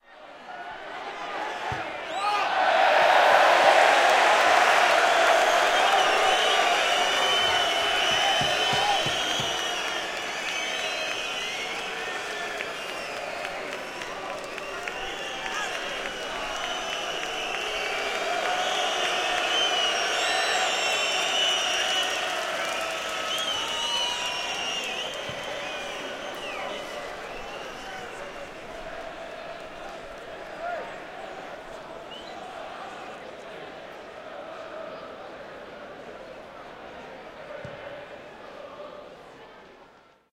I needed small stadium sound effects for a play about the local football club, Brentford FC. The club, very graciously, gave me free access around the ground to home matches early in the season 2006/7. This is an extract from Brentford's game with Bristol City.